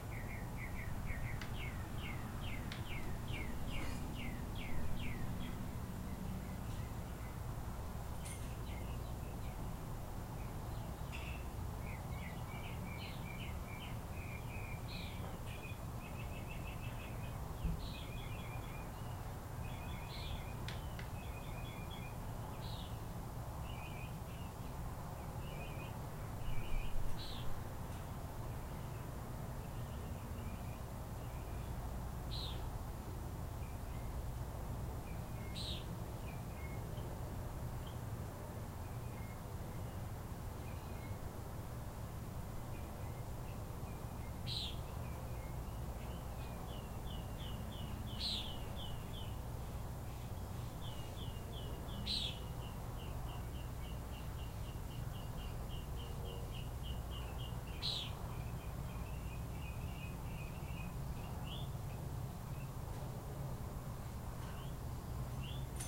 Out on the patio recording with a laptop and USB microphone. Look, it's a bird! I mean, listen, it's a bird!